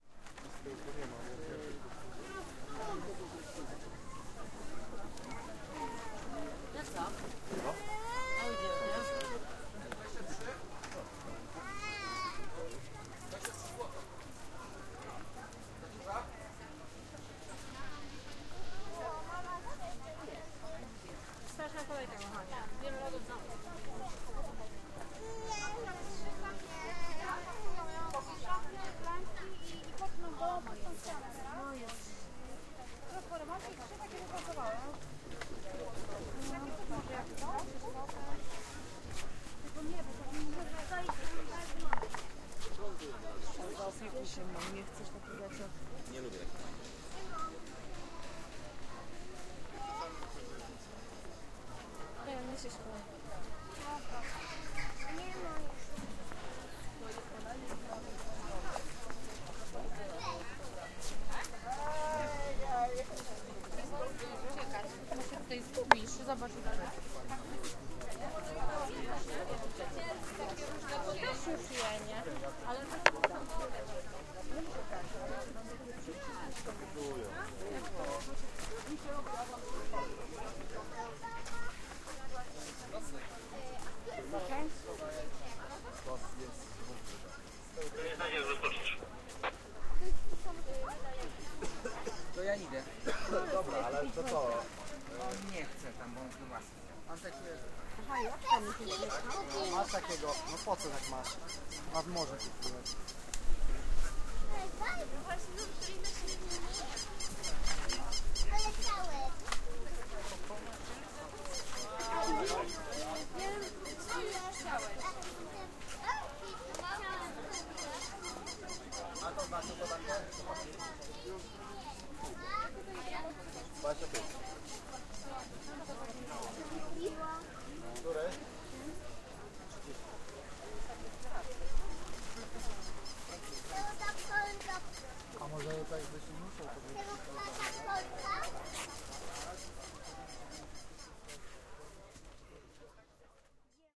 fair, stalls, children's toys, city, holiday wine, walk, market, mall, Poland
Poland, wine, mall, toys, stalls, city, fair, market, childrens, holiday, walk